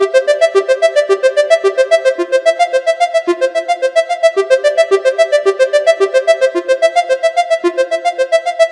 A member of the Alpha loopset, consisting of a set of complementary synth loops. It is:
* In the key of C major, following the chord progression C-F-C-F.
110bpm, synth